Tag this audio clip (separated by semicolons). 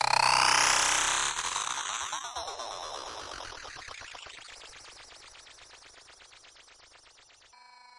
effect; fx; glitch; sfx